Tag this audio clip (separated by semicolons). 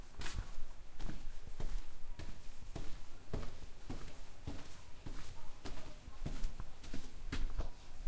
walk; walking; footsteps